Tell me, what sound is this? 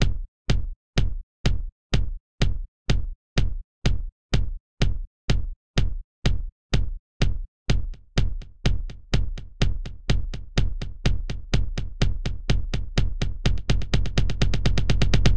Kick build 125BPM Until
Simple kick build.First half is four on the floor the second half is a build. Used 2 different Kick drums to get the sound.
Kick, Build, EDM